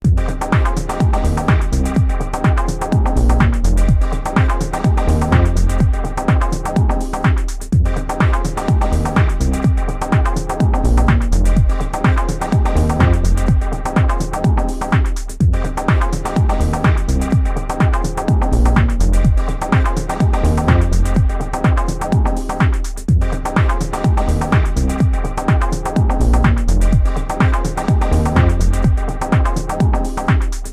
Looping beat for background music
electronic, electro, music, Tempo, Beat, dance, techno, Background